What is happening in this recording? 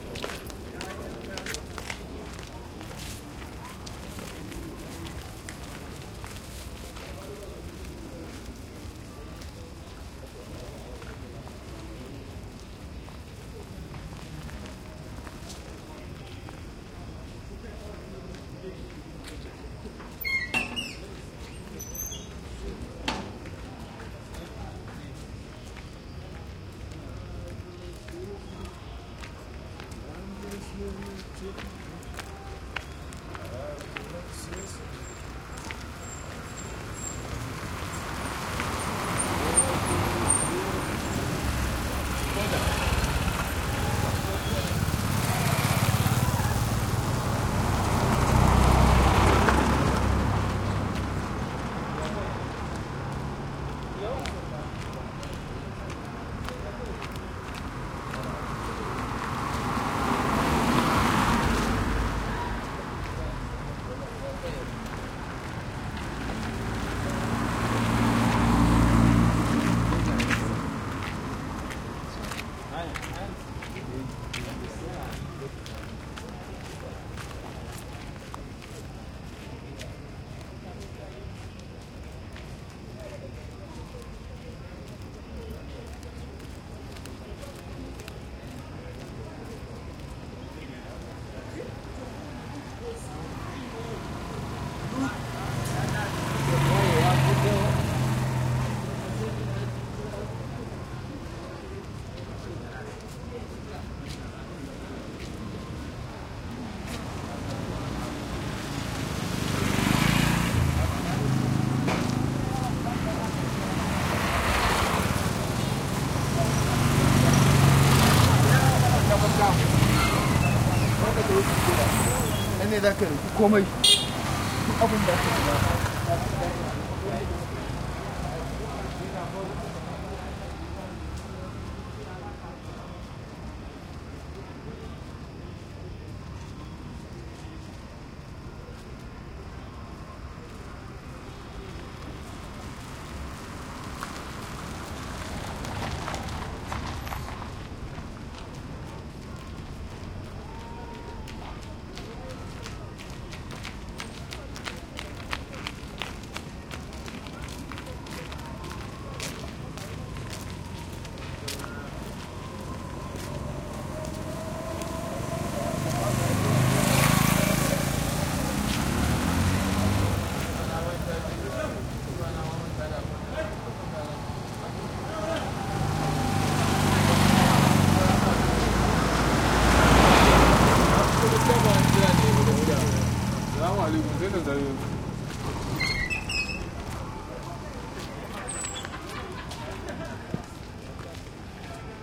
side street light traffic night crickets crowd1 crunchy steps throaty motorcycles and cars Kampala, Uganda, Africa 2016
people, street, crowd, crickets, Uganda, Africa